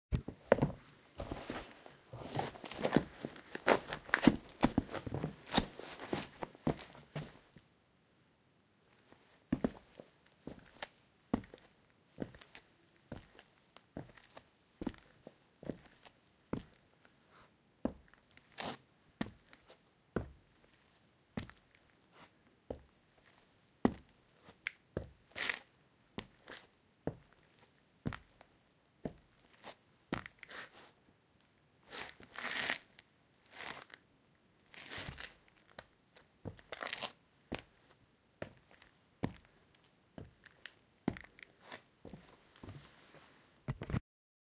Walking on dusty Floor
ambient, dusty, footsteps, walking, floor